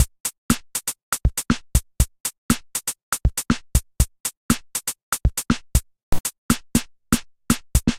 drum loop with fill made with samples from the original doctor rhythm drum machine. 2005